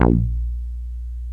progressive psytrance goa psytrance
progressive, psytrance, goa